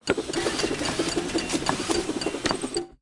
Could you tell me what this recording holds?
Semi cartoonish sound of a robot being fixed. Made for a cancelled student game.
healing, videogame